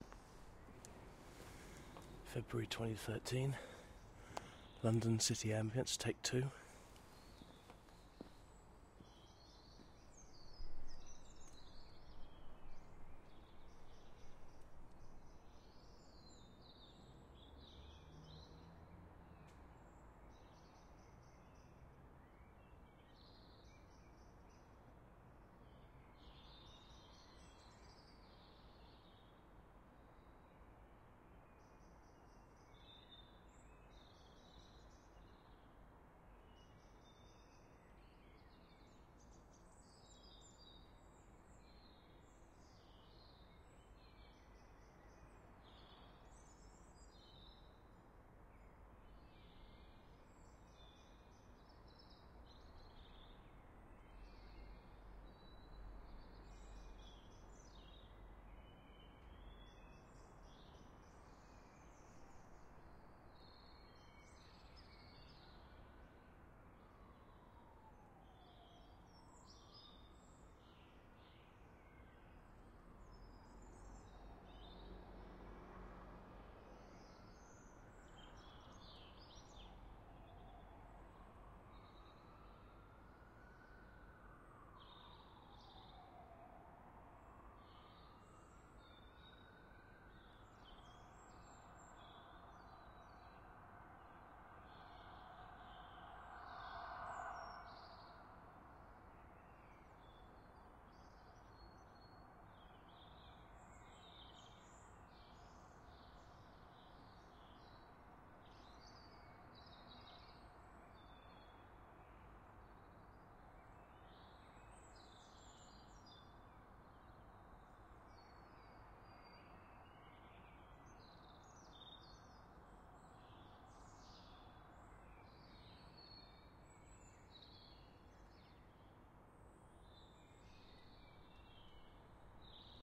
London UK Ambience Feb 2013 15
This is a stereo recording of city ambience in Greenwich, London, UK taken at around 4 in the morning. This recording is unedited, so it will need a bit of spit and polish before use.
ambience, atmosphere, night, suburban, uk, urban